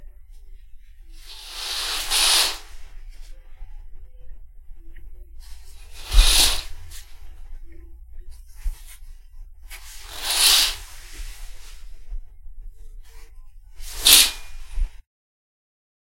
Various Curtains opening and closing
Used for opening and closing of any curtains mainly old ones that still have a bit of a grind effect to it.
drawn, hand, curtains, opened, closed